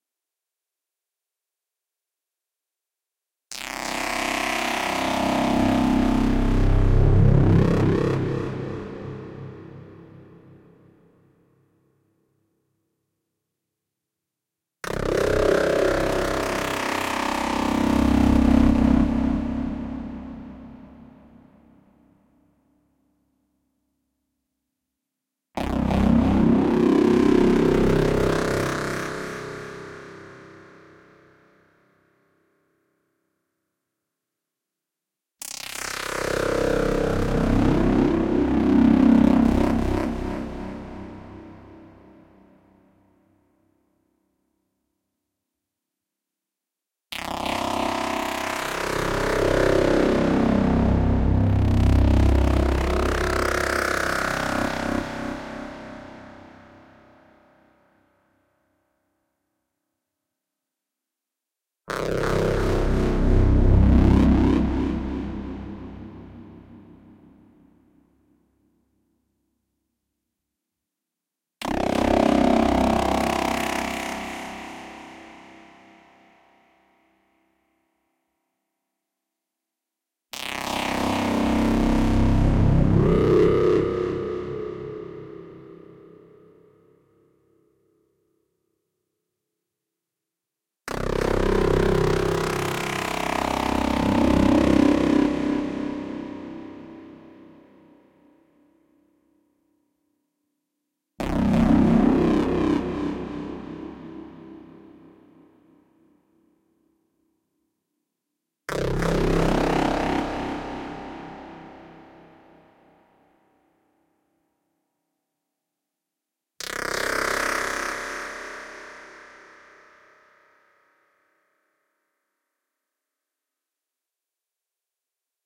synth monstar 02
synth created in reason. using the subtractor. of course added scream, flange, phaser, filter, distortion, compressor, unison. delay and reverb.
alien, dark, distorted, drumstep, dubstep, growl, monster, neurofunk, psybreaks, psytrance, rip, synth, techfunk, wobble